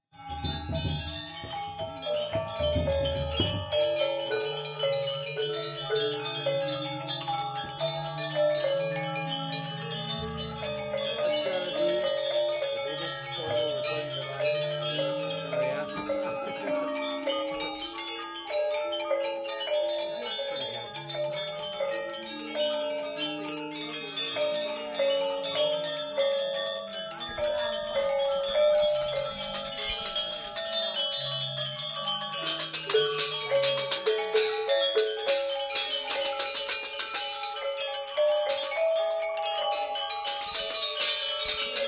Bwana Kumala warmup
University of North Texas Gamelan Bwana Kumala during warmup in the practice room. Recorded in 2006.
bali, gamelan